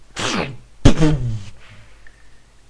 Energy impact 6
An energy effect inspired by anime Fate/Zero or Fate/Stay Night series.